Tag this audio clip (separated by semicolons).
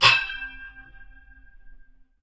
sword; fighter; soldier; knight; slash; sword-slash; blade; ancient